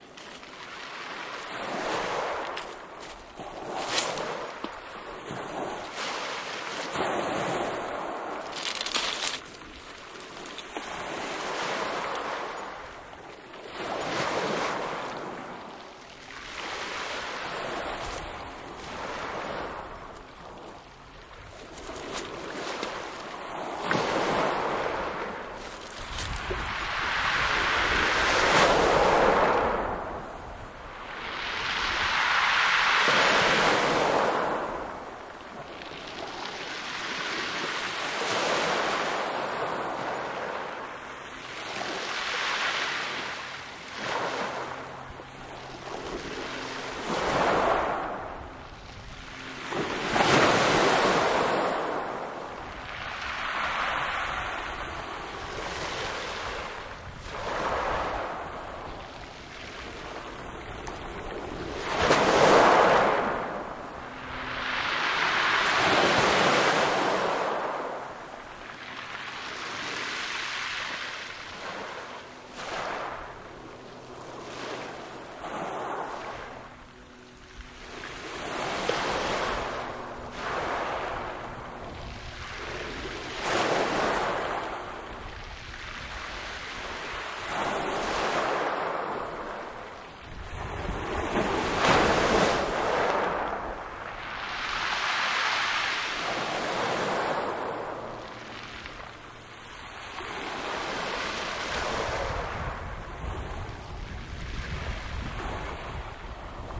123-Portsmouth-Pebble-beach-Jeroen-gooit-steentjes
Seaside recording at the Portsmouth beach, April 2012, with an Olympus LS-10.
No filtering, no editing.
Just another day with Stout Games!
beach, field-recording, ocean, pebble-beach, pebbles, sea, stones, stout-games, water, waves